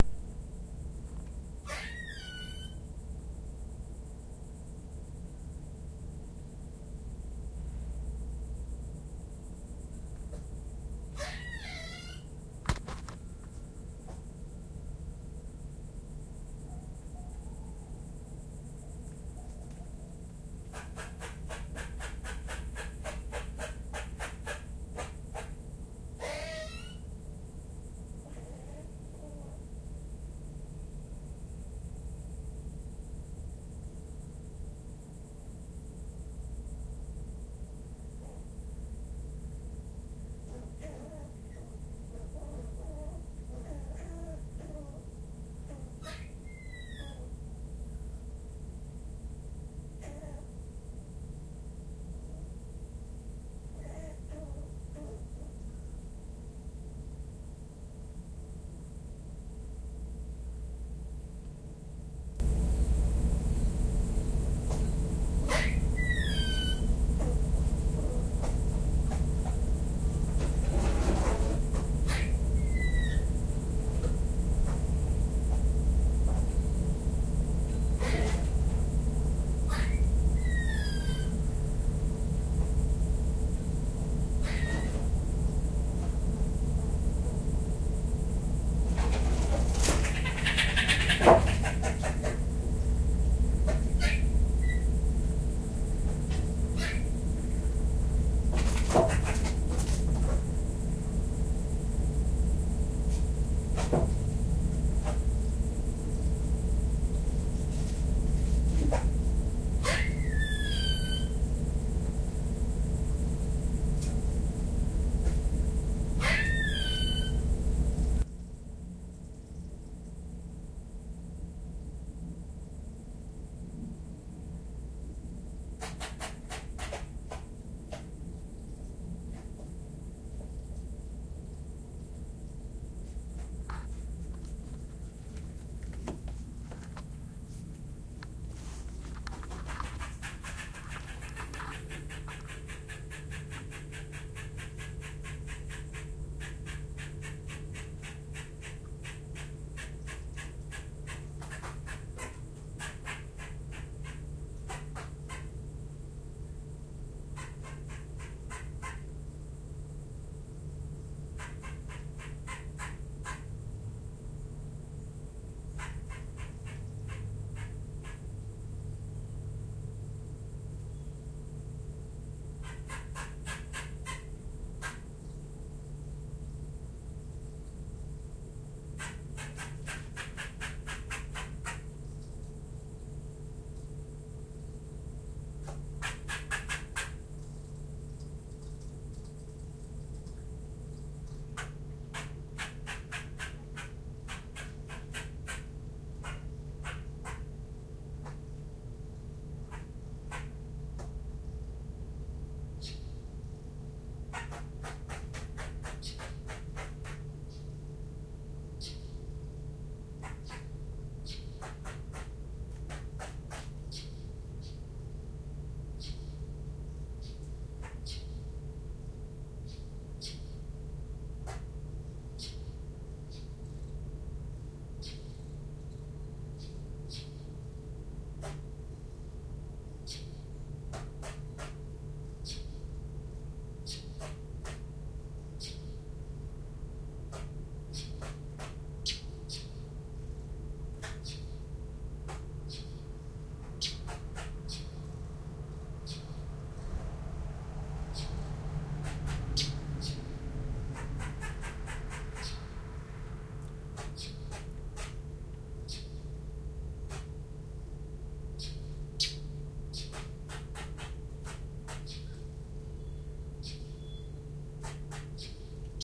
Squirrels, birds and lizards battle for territory and bragging rights on my patio.
bark, chirp, field-recording, squirrel